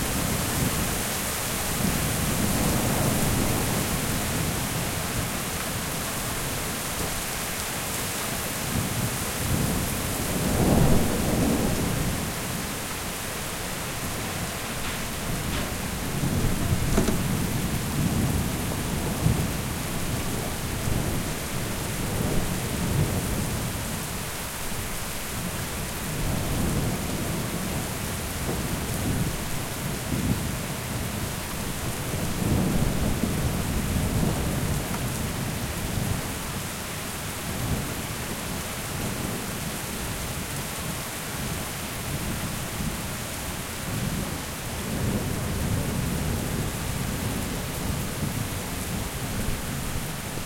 summer storm in switzerland 2018